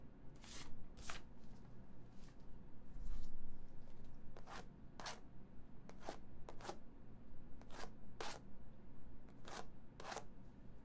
Scratching surface
domain, studio-recording, foley, public